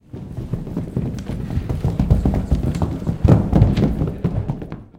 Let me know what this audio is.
A group of people starting to run.